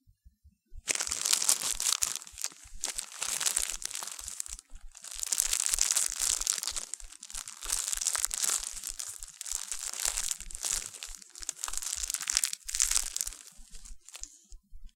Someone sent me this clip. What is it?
step on fallen-leaf
some step or fallen leaf
fallen-leaf, flatten, step